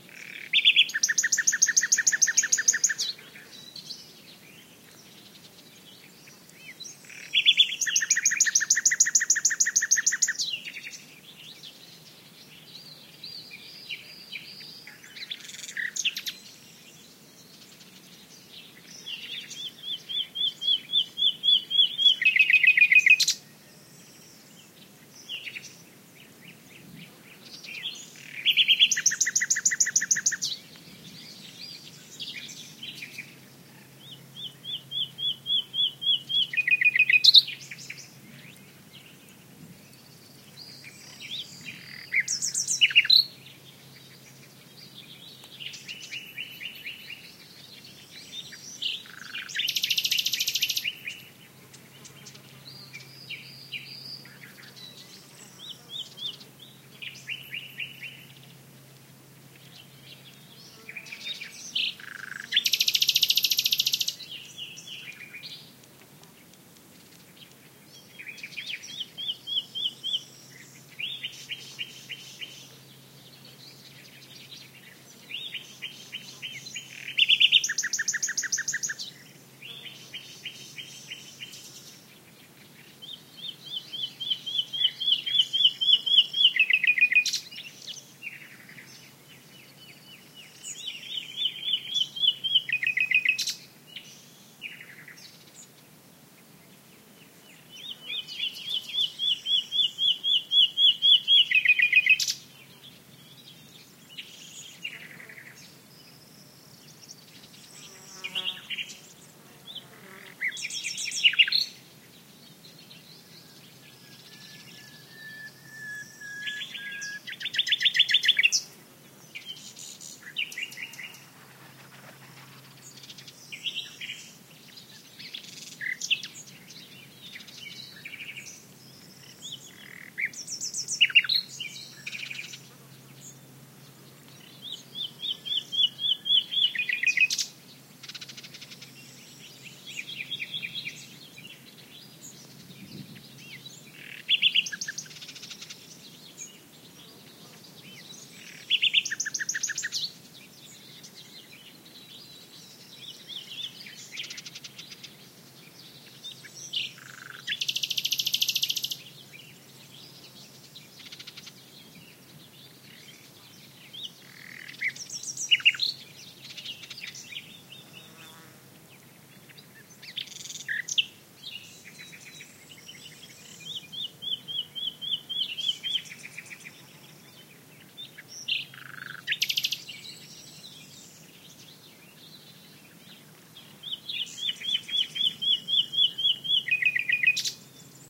Nightingale song recorded in the scrub, other bird (Serin, Warbler) calls in background.